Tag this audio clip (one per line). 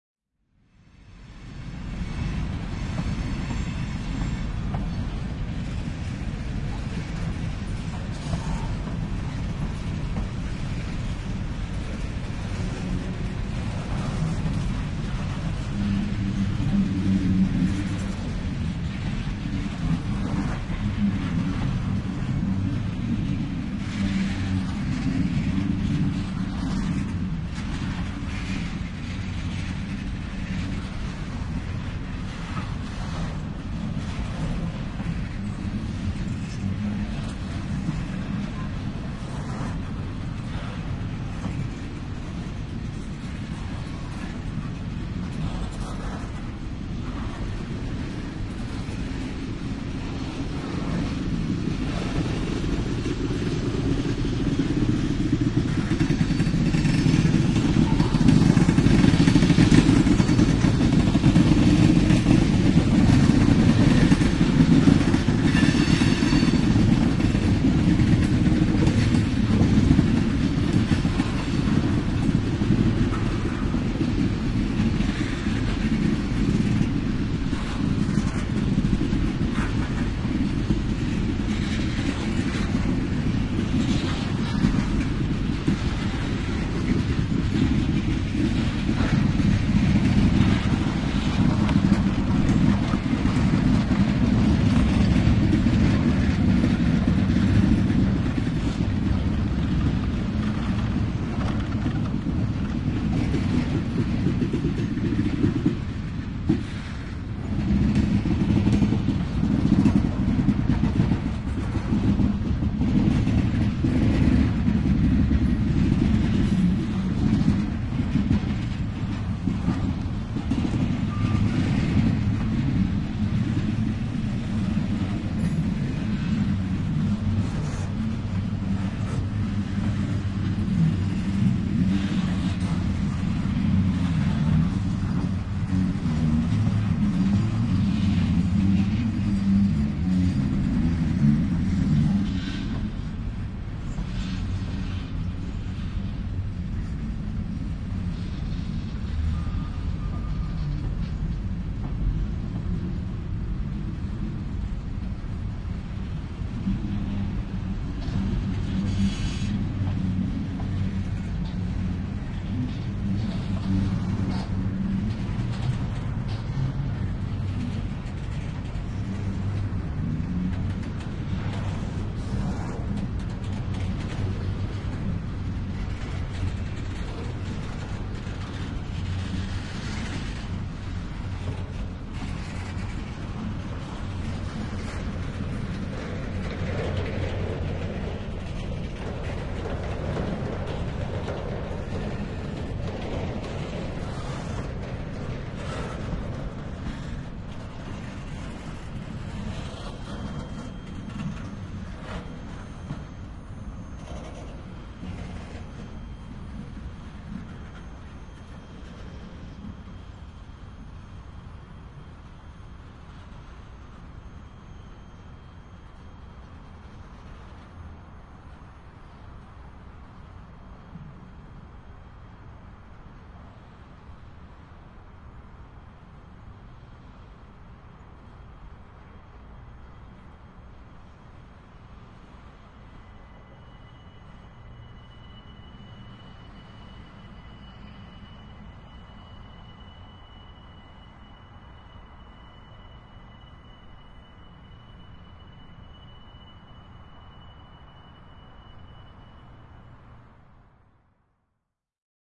ambient
soundscape
field-recording
mechanical
cityscape
locomotive
engine
industrial
train